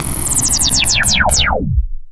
A slowing, retro sci-fi laser zap sound.
polysix, retro, sci-fi, slowing